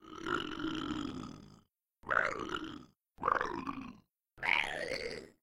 The grunts of an ogre or a goblin.
creature, ogre, beast, grunt